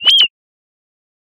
Attack Zound-60
A short electronic bird tweet. This sound was created using the Waldorf Attack VSTi within Cubase SX.
electronic, soundeffect